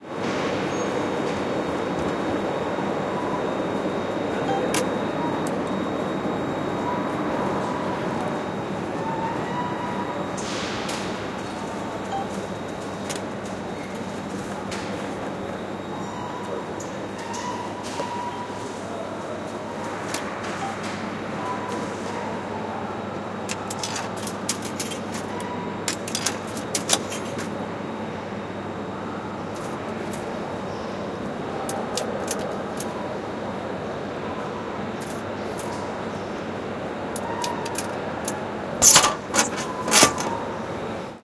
Ticket vending machine
Buying a subway ticket in a vending machine, in the background... sounds of ambiences station, people pass, cross, speak etc...
machine-tickets, mechanism, underground-sound